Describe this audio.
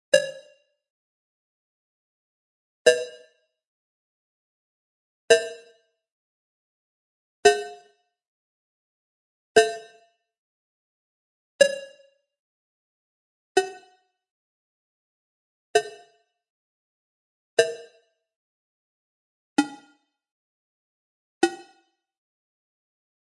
Buttons Sci-Fi (Multi One Shot)
Some nice scifi buttons for you!
press, film, soundesign, console, machine, future, digital, beep, button, sfx, bleep, scifi, small, modern, sounddesign, switch, hi-tech, game, effect